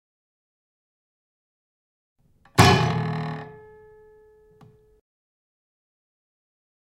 5 Audio Track
strings, fork, vibration
fork, strings